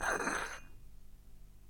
Small tea cup being dragged on a table.
Recorded in a basement book and document storage room. Recording hardware: LG laptop, Edirol FA66 interface, Shure SM57 microphone; software: Audacity (free audio editor).